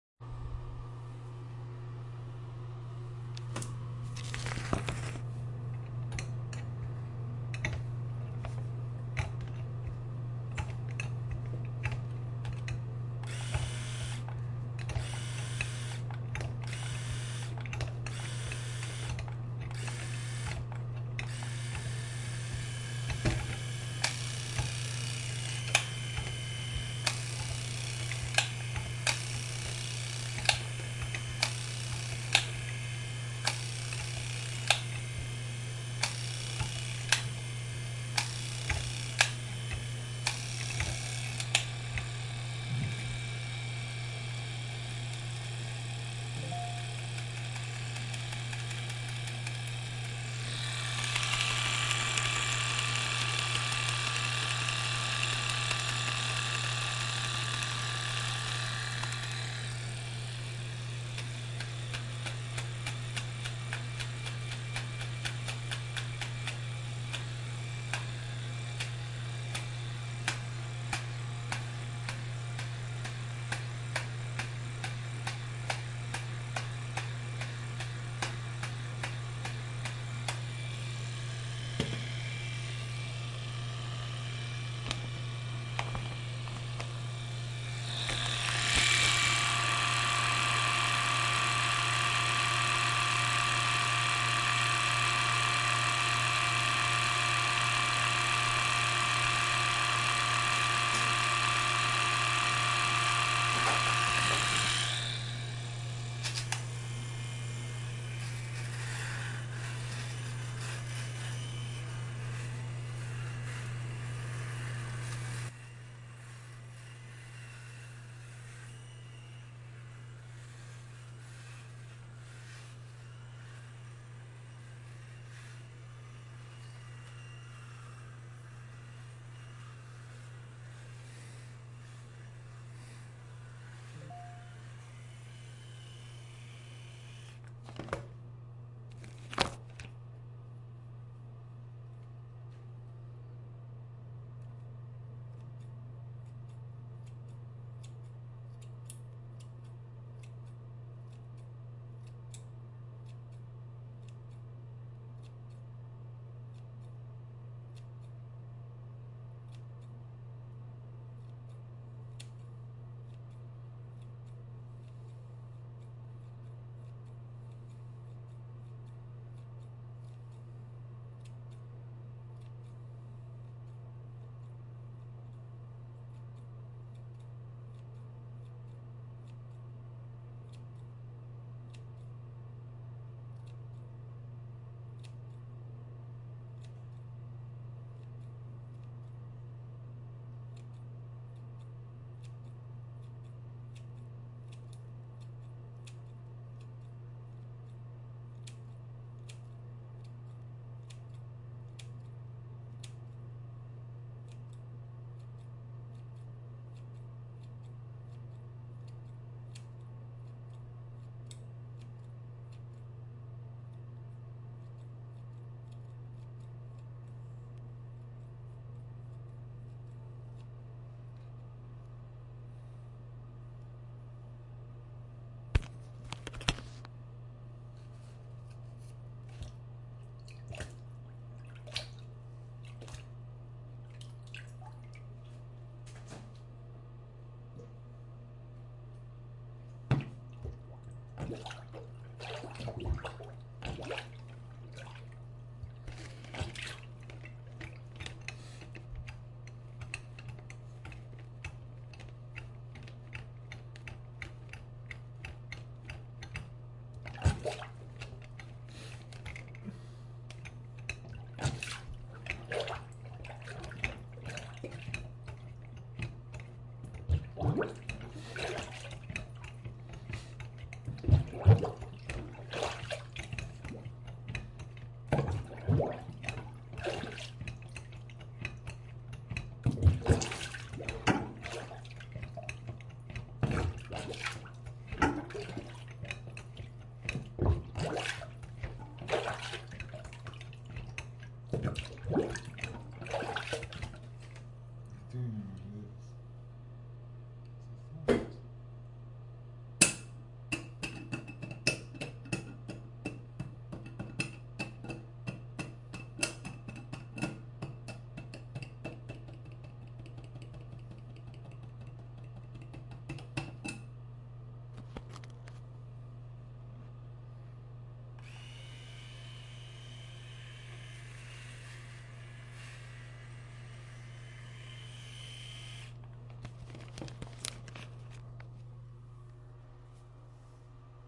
Kanton Levine - electrical device3
I used a razor to make interesting sounds by varying the settings
electric-razor, electrical-device, homemade-instrument, razor